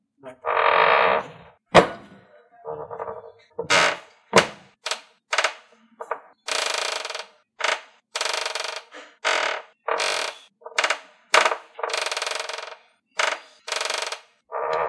been asked on a number of occasions to up load this creaky door sample it all broken up and nees construcking
creaking, creaky, door, samples